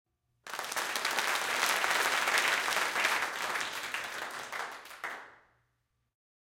S Short applause - alt
These are canned crowd sounds, recorded for a theatrical production. These were recorded in quad, with the design to be played out of four speakers, one near each corner of the room. We made them with a small group of people, and recorded 20 layers or so of each reaction, moving the group around the room. There are some alternative arrangements of the layers, scooted around in time, to make some variation, which would help realism, if the sounds needed to be played back to back, like 3 rounds of applause in a row. These are the “staggered” files.
These were recorded in a medium size hall, with AKG C414’s for the front left and right channels, and Neumann KM184’s for the rear left and right channels.
applauding, group, auditorium, crowd, audience, clap, hand-clapping, applause, applaud, claps, polite, clapping, adults